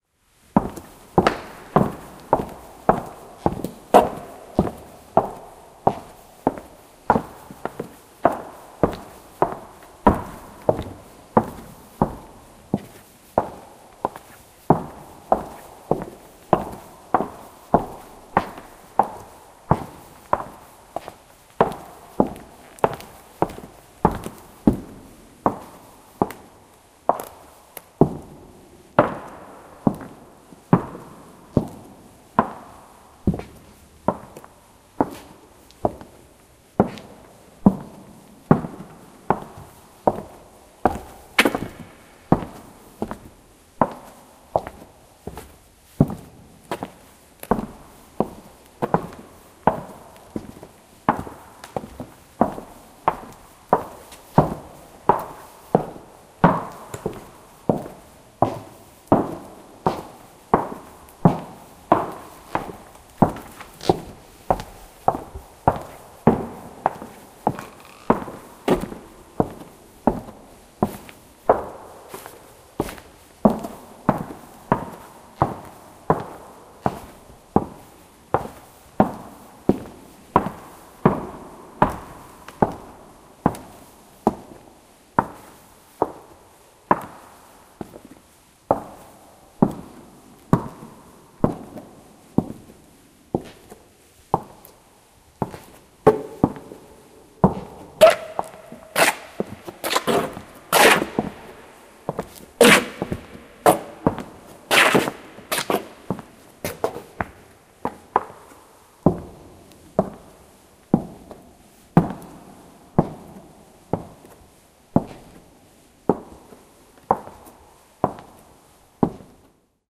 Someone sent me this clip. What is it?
steps in the church
floor, heels, church, steps, wood, reverb